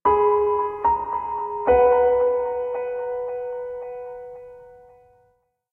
Small chordal progression, part of Piano moods pack.